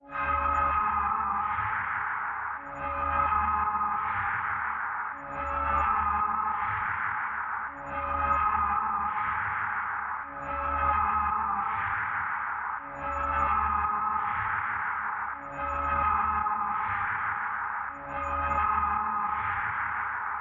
94 bpm 8 bars noise delay LFO
rhythmic, electronic, C, beat, bpm, hard, loop, delay, 90, background